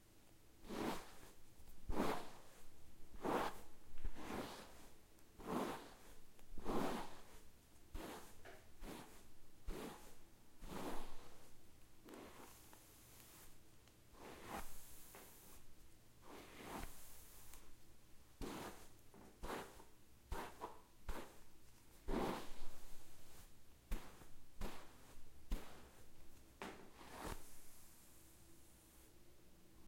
brushing hair
My girlfriend brushing her hair. It sort of sounds like shoveling or walking in snow. Recorded with AT4021s into a Modified Marantz PMD661.
brush, shovel, whoosh, snow